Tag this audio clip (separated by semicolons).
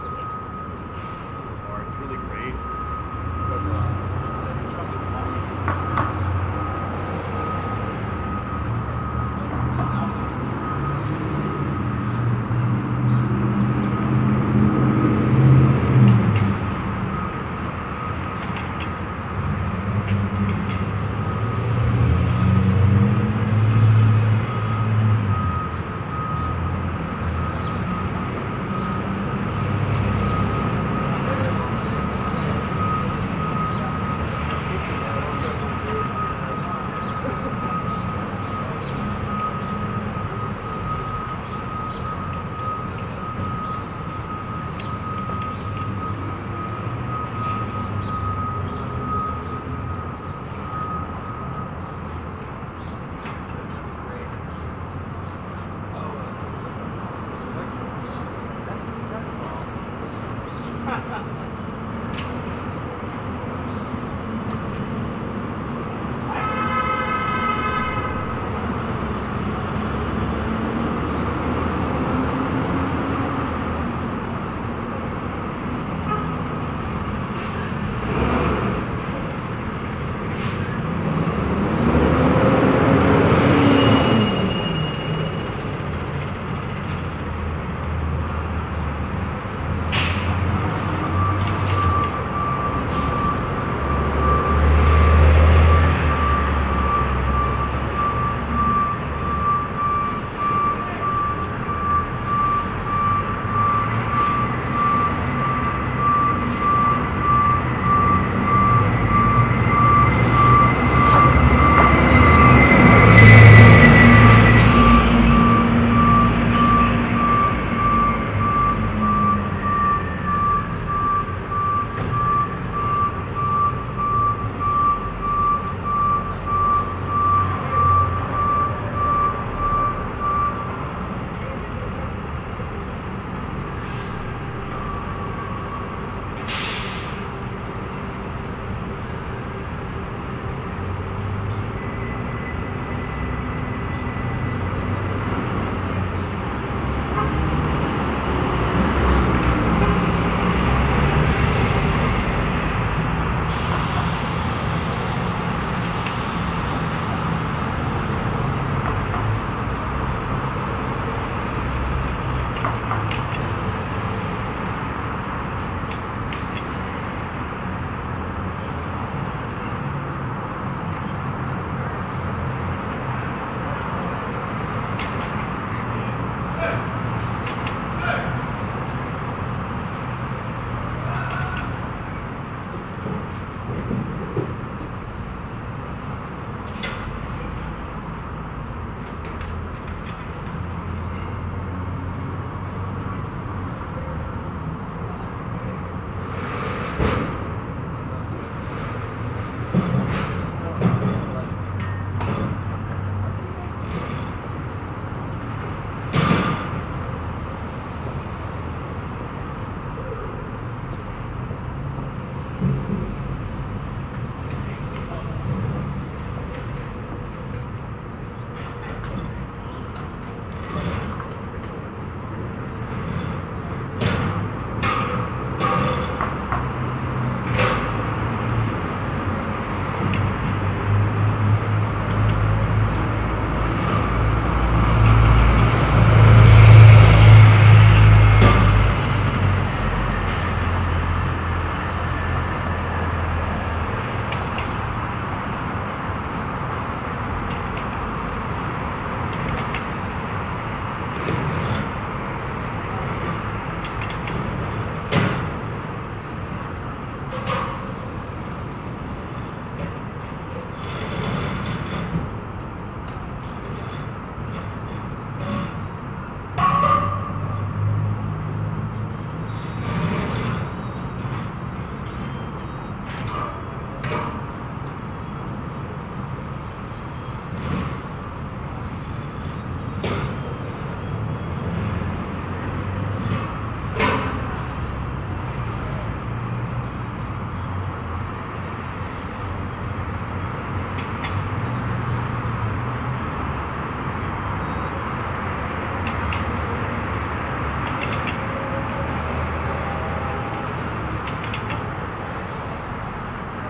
ambience; ambient; recording; field-recording; los; city; street; angeles